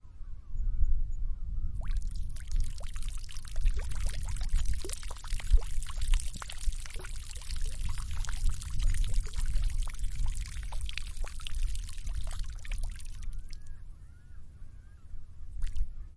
On a beach. Aircraft flying over. Recoded with a Zoom H4n and external directional microphone.